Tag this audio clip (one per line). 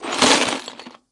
industrial
metal
tools